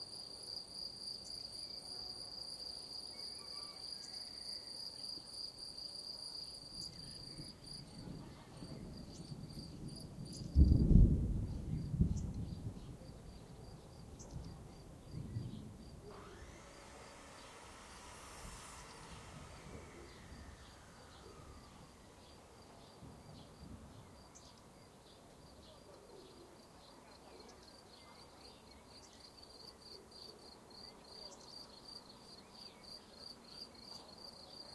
Distant thunder in suburban area
Distant thunder recorded in the afternoon, Pécel, Hungary, 26th of May, 2014 by SONY stereo dictaphone. Saw, birds and crickets are heard in the background.